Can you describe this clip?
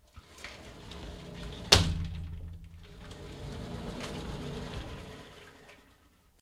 Slide and close
cloth, fabric, hiss, metal, object, slide, swish